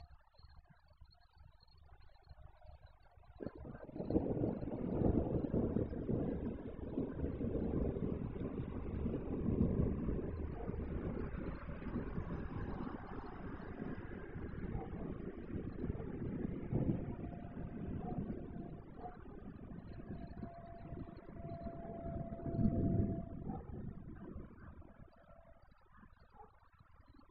2011may10thunder
This is my first thunder recorded recently (on 10th of May, 2011) by a MYAUDIO MP4 player.
lightning, storm, thunder, thunderstorm